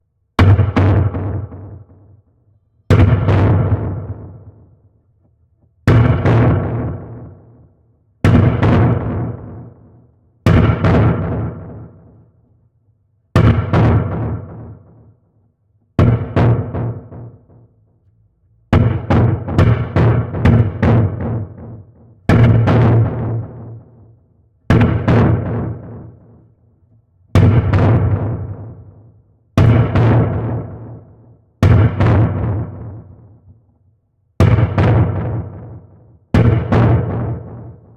Wood Hit 01 Trash2 Around You
The file name itself is labeled with the preset I used.
Original Clip > Trash 2.
bang, boom, cinematic, distortion, drop, explosion, hit, horror, impact, industrial, percussion, percussive, pop, pow, processed, saturated, scary, sci-fi, shield, smack, strike, trashed